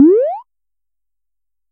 Cartoon jump
Jumping sound for a cartoon or a video game. Made in Serum for the animated short "Or was it a dream?" which you can see here if you wish to hear the sound in its original context.
If you use the sound in a somewhat interesting project, leave a link below :)